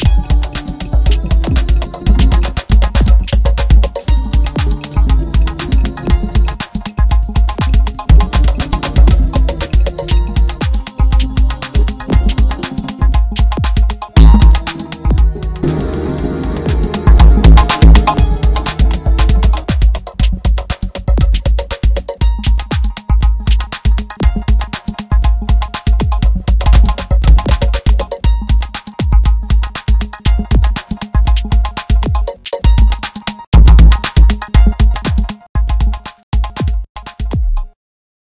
une chansson pr dancer